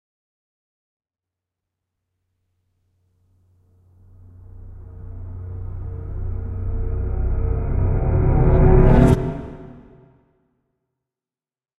Scary Tension Buildup 02
Creepy, Horror, Jumpscare, Scary, Sfx, Spooky, Suspense, Tense, Tension